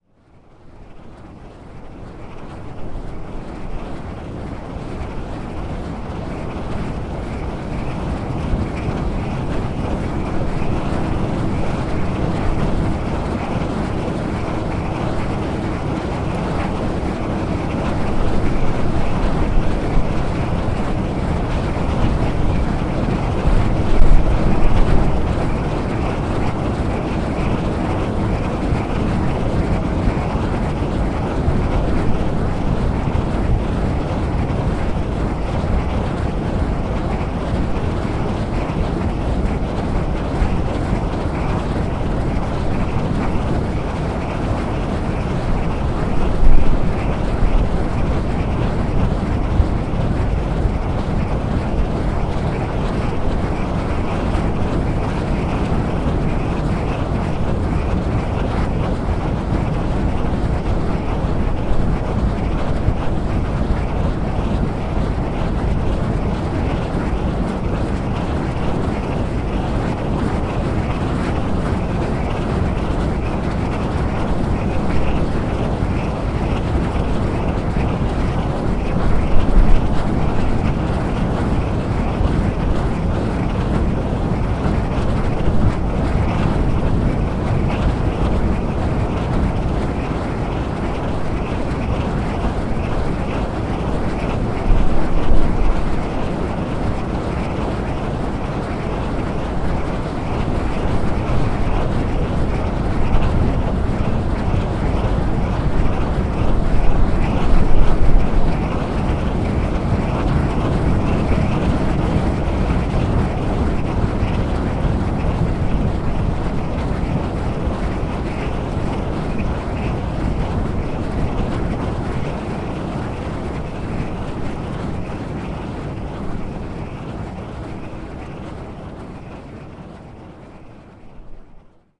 Esperance Wind Farm II
Esperance was the first place to establish a wind farm in Australia. This recording was done approximately 20 metres from the wind generator using my Zoom H4 with a Rycote wind sock.